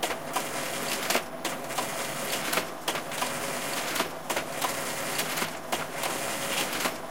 Machine loop 04
Various loops from a range of office, factory and industrial machinery. Useful background SFX loops
factory, industrial, loop, machine, machinery, office, plant, print, sfx